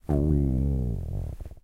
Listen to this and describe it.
Belly rumble 2
Someone was rather hungry.
Recorded with Zoom H4n
driesenaar, human, peristalsis, stomach